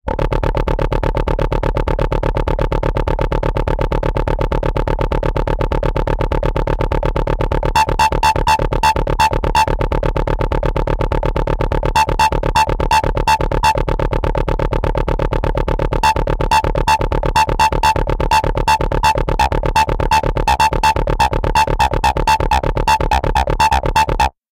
Tractor Arpeggiator Synth
Here's just a quick interesting patch I made starting with ES-M by Logic and using Slate Digital Plugins to shape the sound.
arpeggiator, engine, es-m, synth, synthesizer, tractor